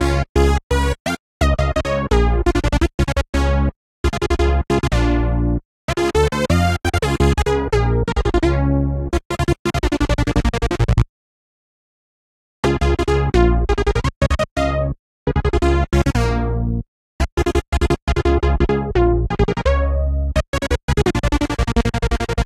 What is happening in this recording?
urban street warrior synth2
Synthloop which I created with Thor in Reason.
acid, digital, electro, lead, synth, thor, trance